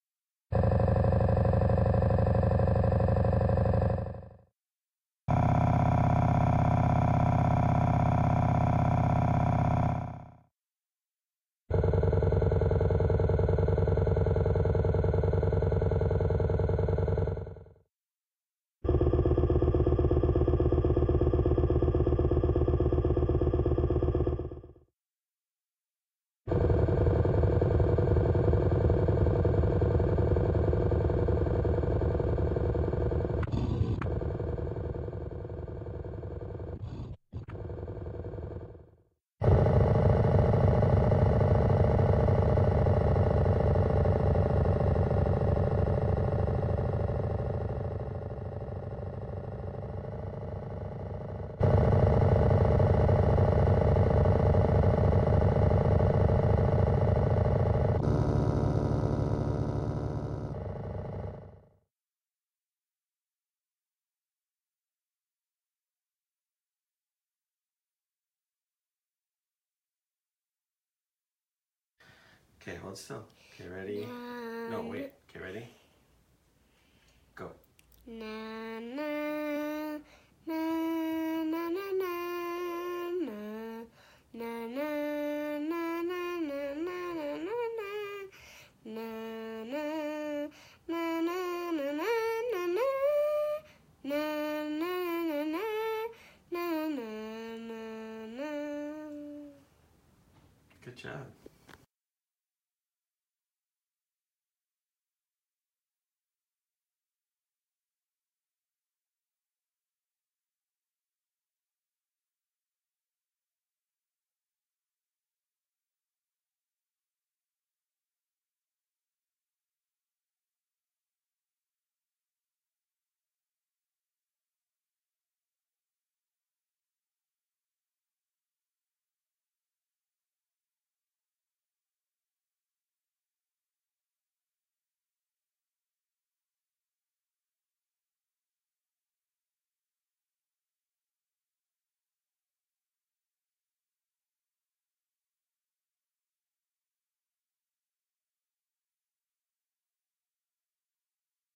Electronic Engine Idle 1
A second collection of various pitches of electronic engines, created using envelopes in an Ableton Wavetable instrument.
car, motorcycle, electronic, truck, engine, motor, idle